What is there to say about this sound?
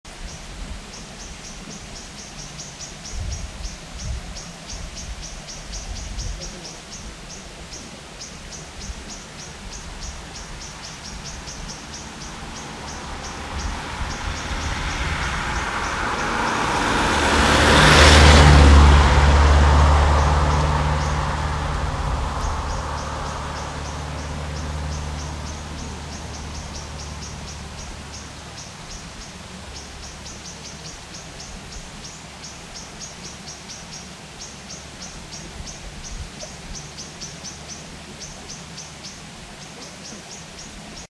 Recorded on the road descending from the Sljeme hill with iphone XS.